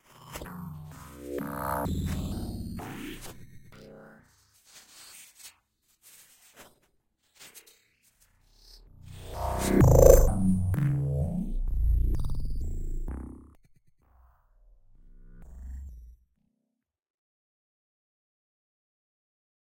Machine Glitches
Recorded as part of my mus152 class with my friends Andrew and Kevin. On a zoom h6 an sm57, an at2020 and a lousy amp.
abstract, alien, altered, digital, electric, electronic, freaky, future, garcia, glitch, mus152, noise, processed, sac, sci-fi, sfx, sound-design, sounddesign, soundeffect, strange, weird